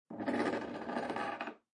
Wooden Bookshelf Scrape 1 6
Bookshelf, Design, Foley, Hard, Light, Real, Recording, Scrape, Scratch, Sound, Surface, Wodden, Wood